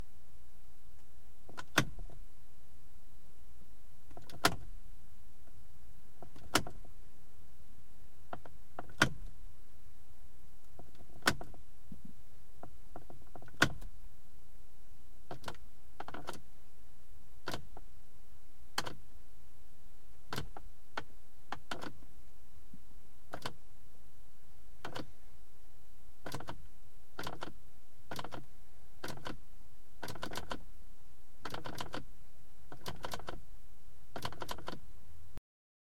High beam function switch on a Mercedes Benz 190E, shot from the passenger seat with a Rode NT1a. First you will hear the switch pushed away from the driver (into locked position) and back. Then there is the pull-towards driver sound (flash position).